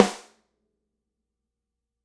dry snare center 12
Snare drum recorded using a combination of direct and overhead mics. No processing has been done to the samples beyond mixing the mic sources.
acoustic, drum, dry, instrument, multi, real, snare, stereo, velocity